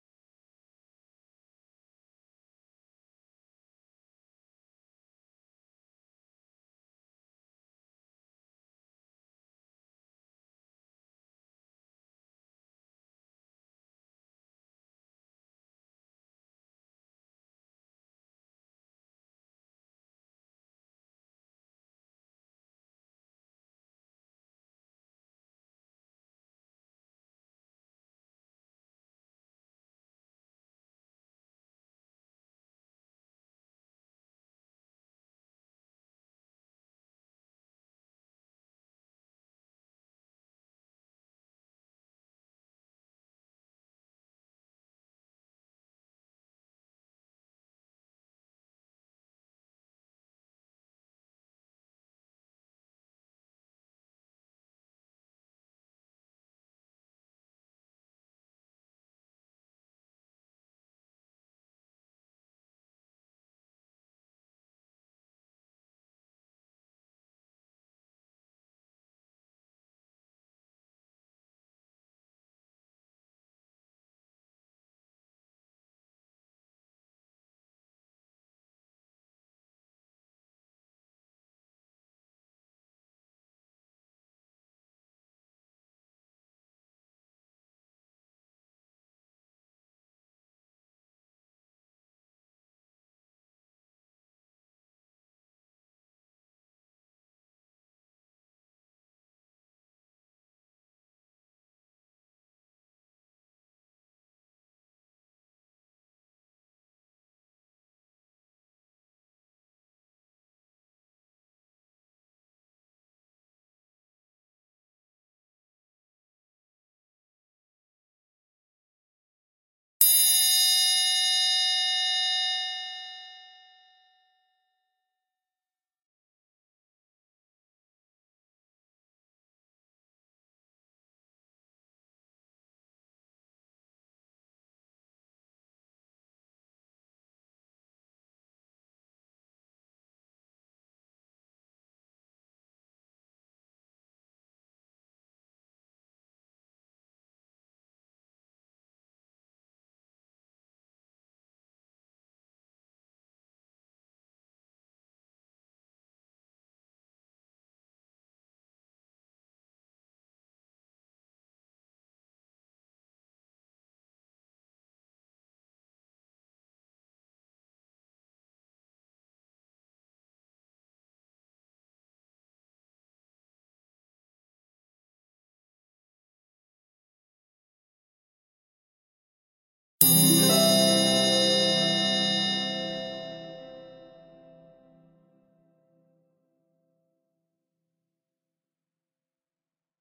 A 3 minute silent timer with 1 minute warning chime and harp strum at 3 minutes